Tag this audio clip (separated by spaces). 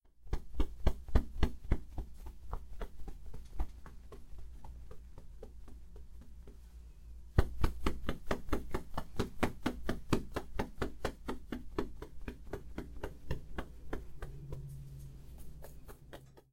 Bird Birds Nature